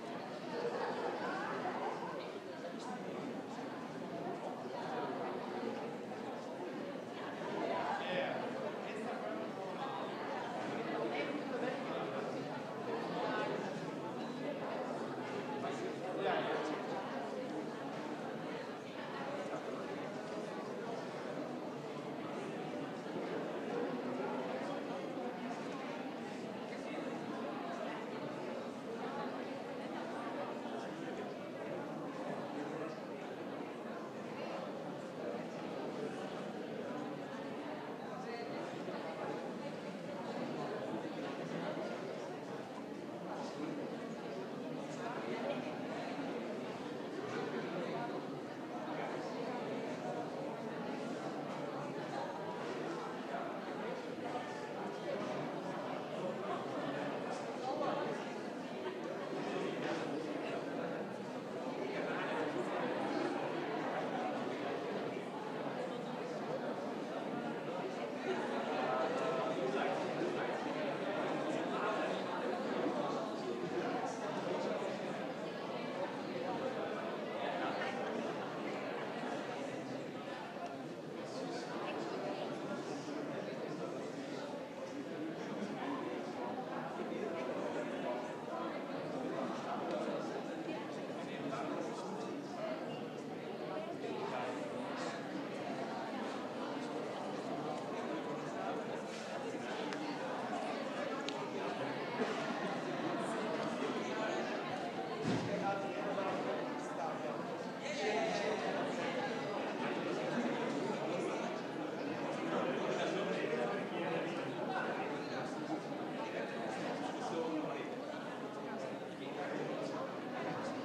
A group of people is talking in flemish, you can not hear exact words only distant chatter.
murmuring, distant, ambience, murmur, chatter, indistinct, crowd, people, voices, talking